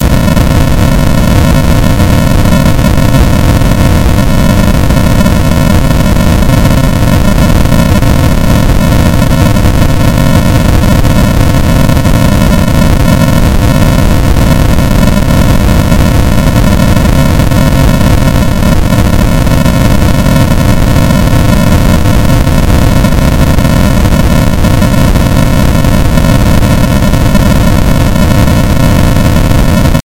05 LFNoise0 400Hz
This kind of generates random values at a certain frequency. In this example, the frequency is 400Hz.The algorithm for this noise was created two years ago by myself in C++, as an imitation of noise generators in SuperCollider 2.
step, noise, frequency, low